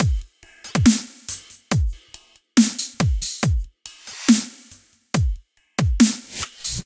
140 dubstep loop 7
Genre: Dubstep drum loop Tempo: 140 BPM Made in Reason Enjoy !
140, quality, kick, snare